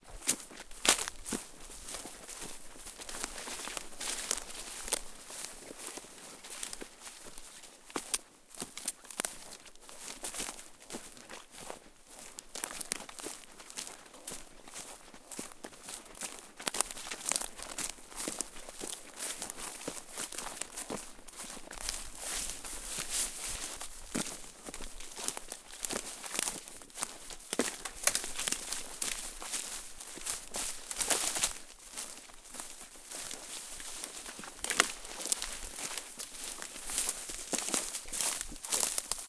pine-forest--ww2-soldier--walk--medium-thick-vegetation
Soldier in World War II gear walking in a Finnish pine forest. Summer.